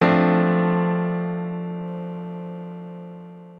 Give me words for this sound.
Another Piano riff 01
This is a simple piano riff that works quite well for timestretched dance piano stabs. It's a four note chord (F A C D) using the free DSK Acoustic Keys VST without any kind of effects and sequenced in Madtracker2.
I have found that if you add a low-pass filter, some creative EQ and reverb, this becomes a lovely lush texture as well.